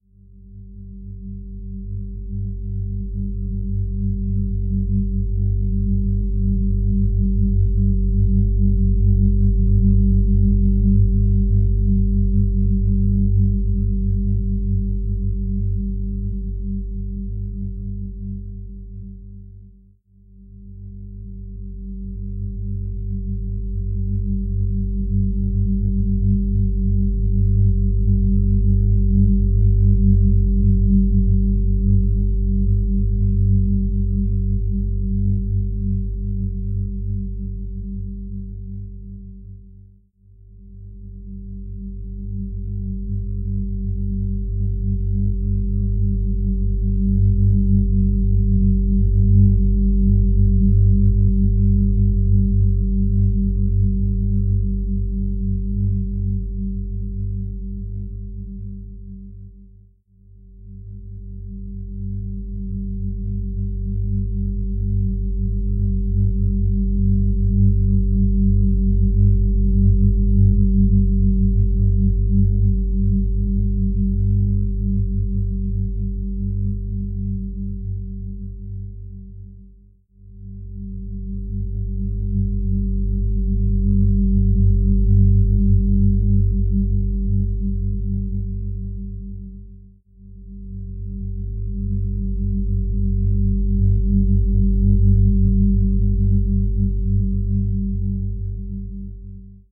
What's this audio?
I was fooling around with binaural beats and then things got weird. The sound is layered with several octaves of G and G flat.